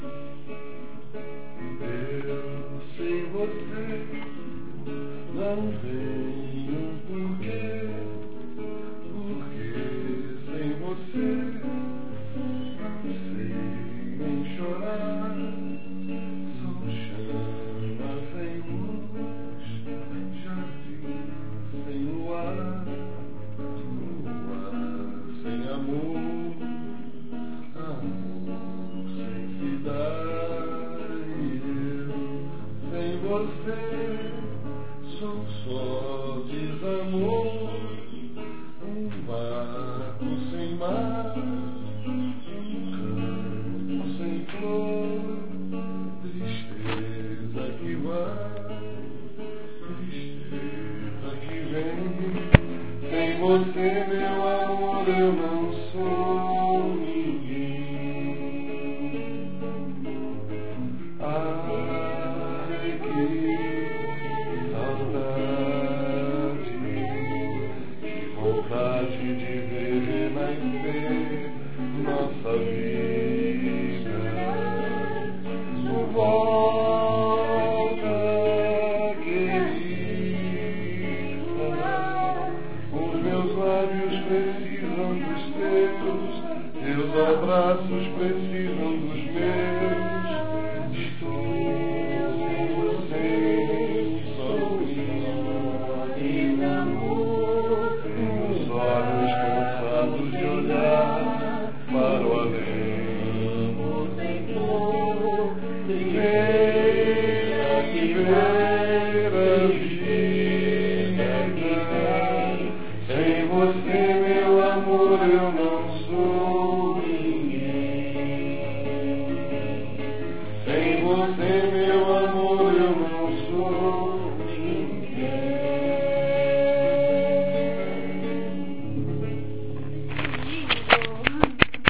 Dad and Mom singing.
Just my mom and dad singing a brazilian song, Prelúdio do Samba.